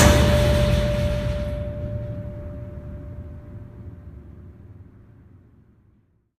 metal-gate-slam
The repercussions of hitting a metal pole with a small metal gate, at the bottom of a 10 story stairwell. Causes the gate to rattle and shake. Recorded with an iPhone 6.
clang, hit, impact, industrial, metal, metallic, percussion, pole, ring, stair, strike